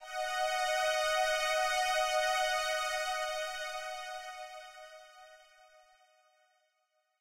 Pad 4th+7th
These sounds are samples taken from our 'Music Based on Final Fantasy' album which will be released on 25th April 2017.
Music-Based-on-Final-Fantasy, Pad, Sample, Synth